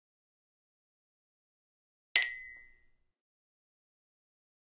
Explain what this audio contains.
Champagne fluke cheers empty glass close perspective.
Stereo Matched Oktava MC-012 Cadioid Capsules XY Stereo Array